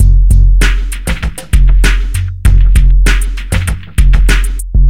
Drumloop A new age starts 1 break - 2 bar - 98 BPM (no swing)
groovy, breakbeat, break, drum-loop, beat